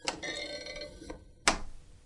Door handle spring
Digital recorder - processed using Audacity
close, closing, clunk, creak, creaky, door, gate, handle, open, opening, spring, squeak, squeaky, wood, wooden